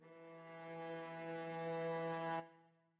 Double Bass Rise 5th
These sounds are samples taken from our 'Music Based on Final Fantasy' album which will be released on 25th April 2017.
Rise, Double